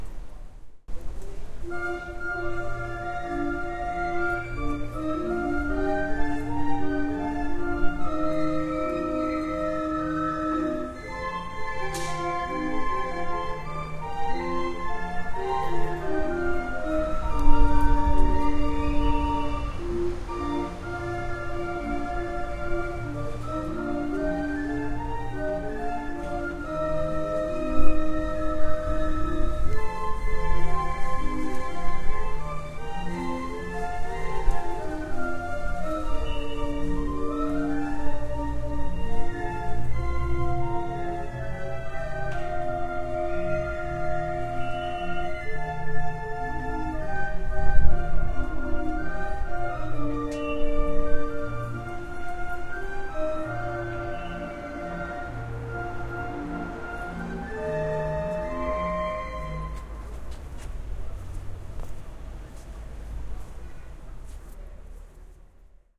Organ grinder paris2
Here is a street organ recorded in Paris from my 5th. floor balcony on rue Boursault. Very ambient, with reverberation between the buildings but a lovely real space. These sounds worked great in a film I worked on recently. They need cleaning up. There is wind, etc... It's up to you.
orgue, de, field-recording, organ-grinder, barbarie, paris, street-organ